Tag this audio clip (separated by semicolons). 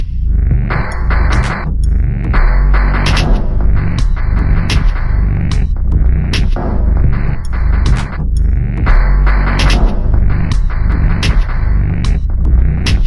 glitch-hop; experimental; monome; loop; recordings; undanceable; rhythm